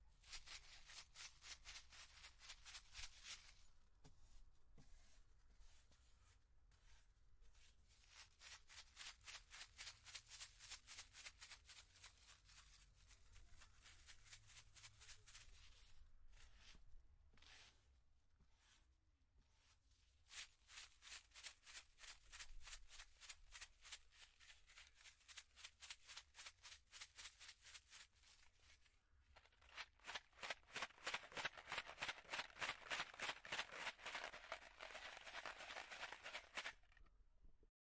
Salt sachet spicing something